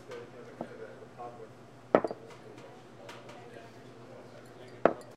glass being put down
This is a recording of a thick glass being set down on a hard counter at the Folsom St. Coffee Co. in Boulder, Colorado.
coffee, counter, glass